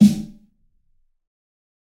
fat snare of god 018
This is a realistic snare I've made mixing various sounds. This time it sounds fatter